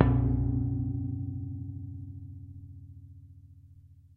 Hitting a metal barrel. Sounds a bit like a cong. Recorded with Shure SM 58.